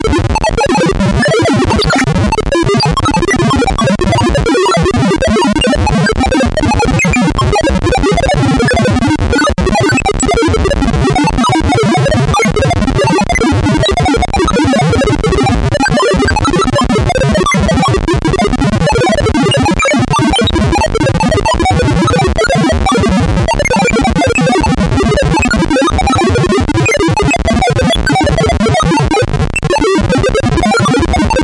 Cheezy "computer like" sound like you can hear them in cartoons. Made on a Roland System100 vintage modular synth.
gameboy, arcade, computer, 8-bit, lo-fi, chiptune, cartoon, video-game, chip, retro